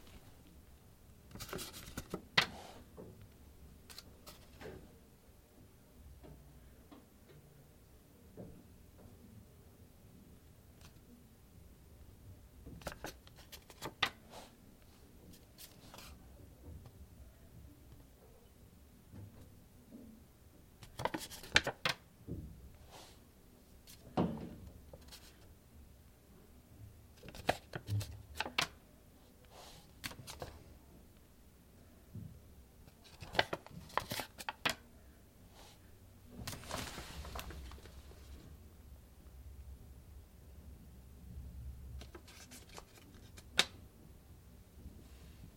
handling paper
The sound of someone handling a paper.
moving, rustling, crumbling, page, turning, document, touching, handling, hard, folding, bending, cardboard, box, paper, book